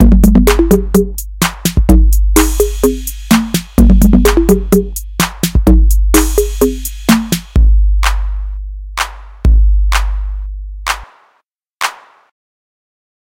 TR-808 Beat 127BPM
TR-808 Beat. Snickerdoodle cookies are amazing,
electronic, 127bpm, tr-808, beat, 127, 4, snickerdoodle, house, bpm